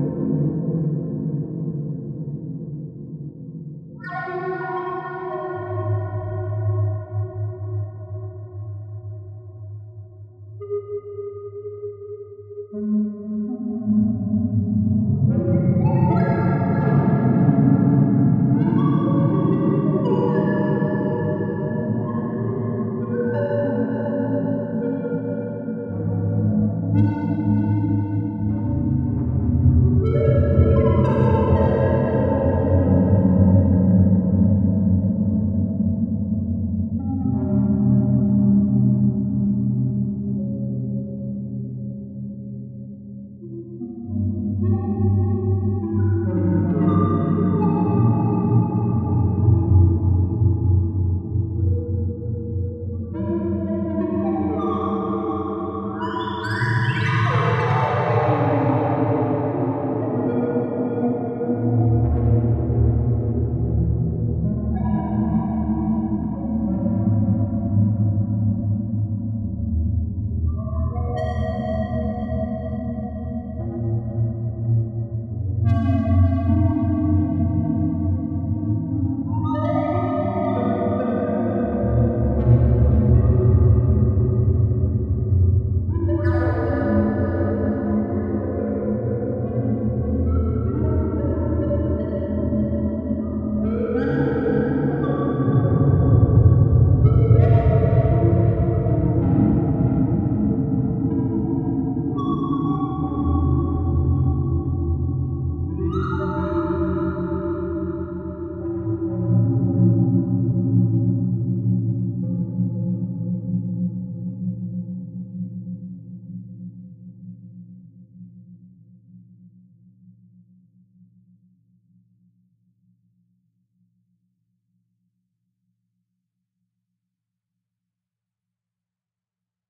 archi soundscape melancholy2
Synthesized scraping and howling sounds.
synthesized, scraping, howling, sounds